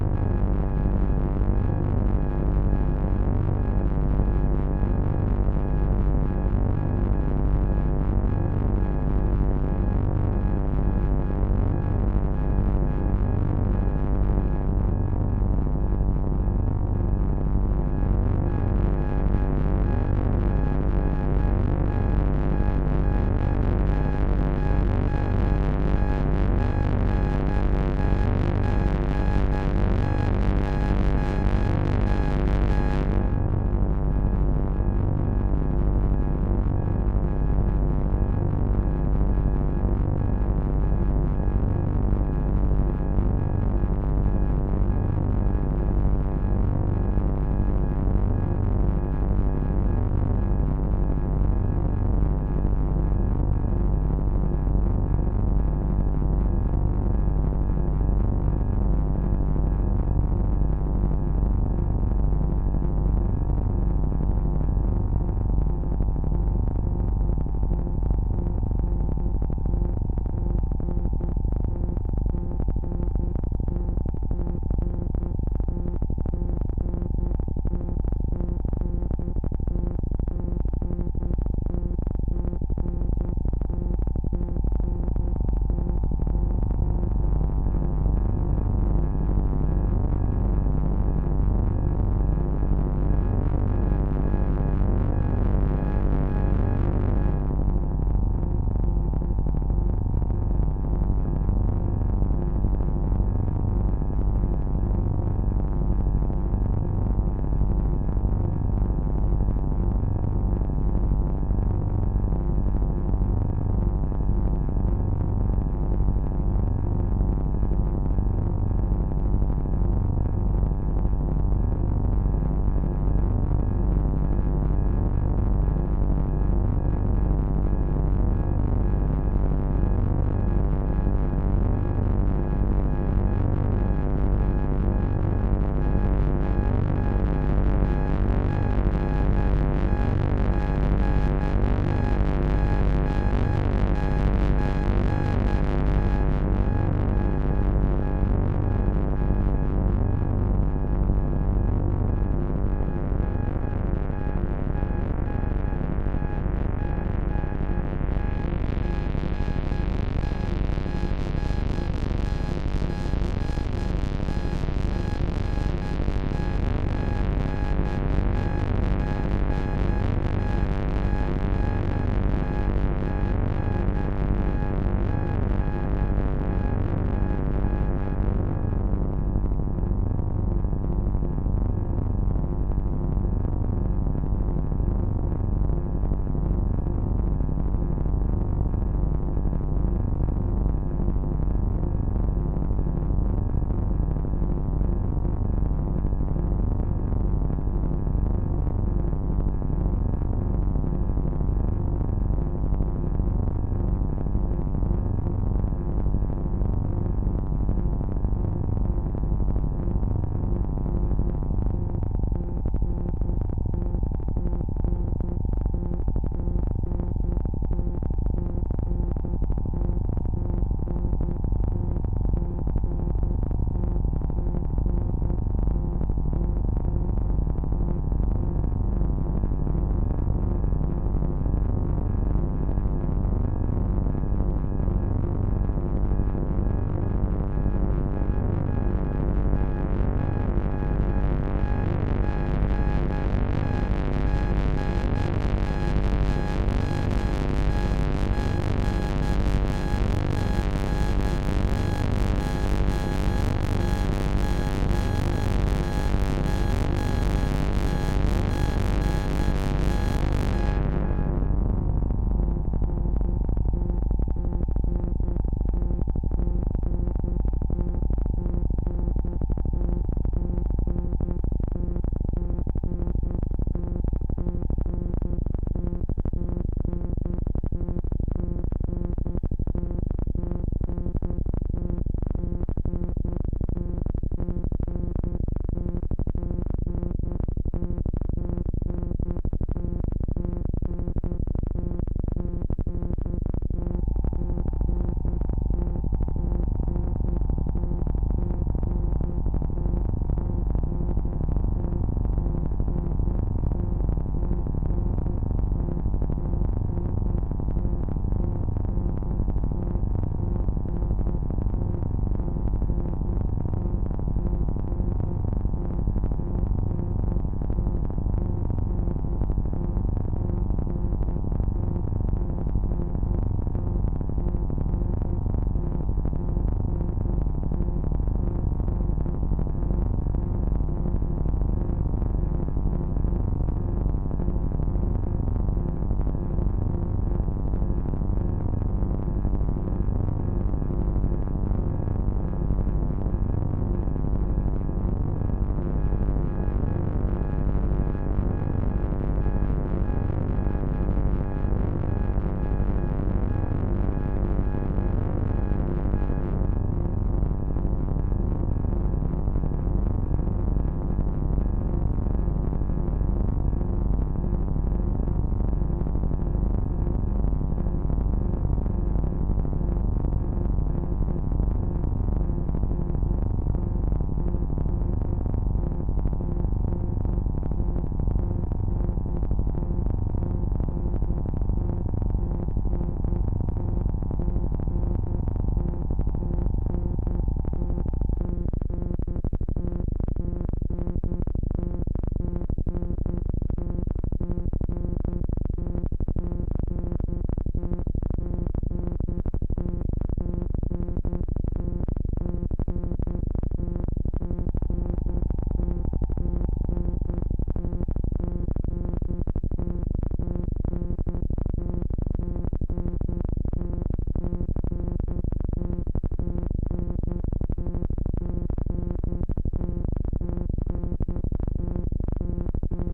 Drones and sequences made by using DSI Tetra and Marantz recorder.
Analog, Synth, Tetra